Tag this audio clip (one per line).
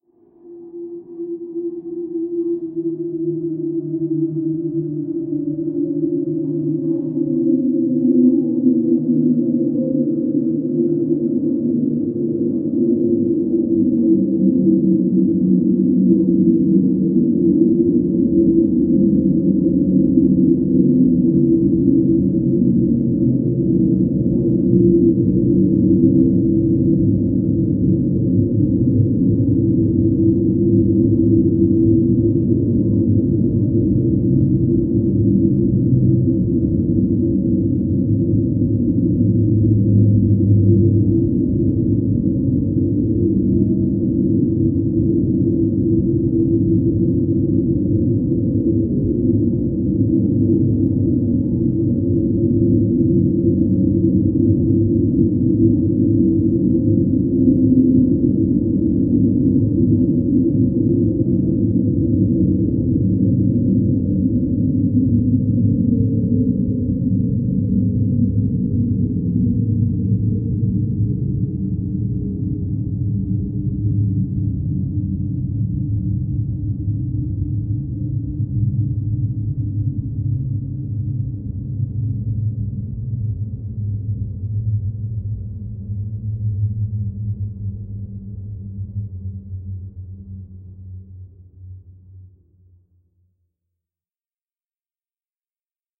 ambient
drone
reaktor
soundscape
space
sweep